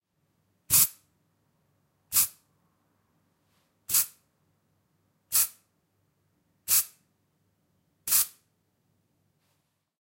RHYTHMIC SPRAY 1
Sound / noise of a spraycan, good for hi hat as well.
aerosol, air, effect, hh, high-frequencies, rhythm, rhythmic, spray, spraycan